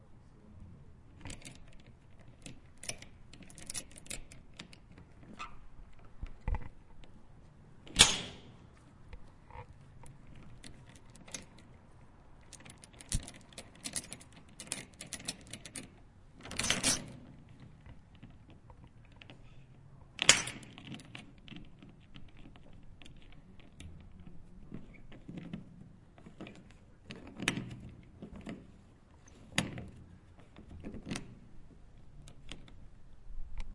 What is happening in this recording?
industrial skipbin chainlinks
fiddling with chain links for locking skip-bin
chains, links, industrial, chain